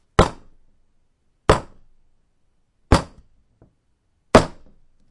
Rubber Metal Wood Impact Collision Bang
Hitting a small metal thing (which was positioned on another metal thing which was lying on a wooden table) with a rubber hammer.